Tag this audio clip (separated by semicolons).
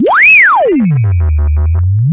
abstract; analog; analogue; beep; bleep; cartoon; comedy; electro; electronic; filter; fun; funny; fx; game; happy-new-ears; lol; moog; ridicule; sonokids-omni; sound-effect; soundesign; sweep; synth; synthesizer; toy